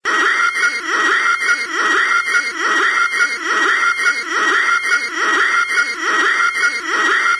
A multi-function alarm sound that sounds a bit like an alarm of a alien spaceship or an alien environment. It can fit pretty well in anothers situations too.
Made in a samsung cell phone, using looper app, and my voice and body noises.